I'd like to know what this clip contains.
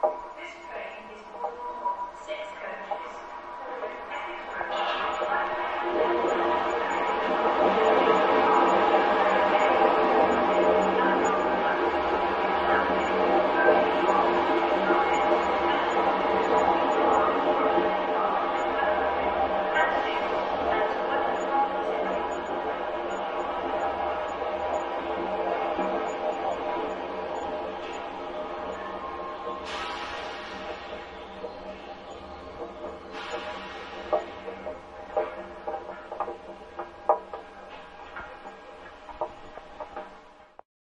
Contact mic recording
contact, recording, field, train, metal, mic